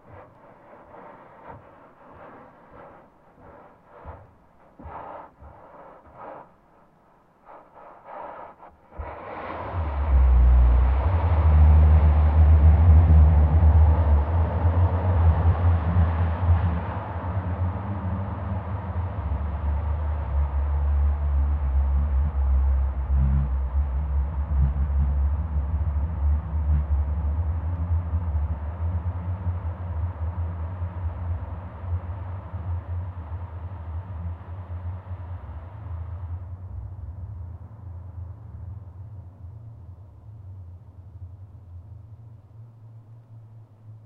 Singing sand dune
Recordist Diane Hope.
drone, Kelso-dunes, hydrophone, California, singing-sand-dune, booming-sand-dune, boom, musical-sand-dune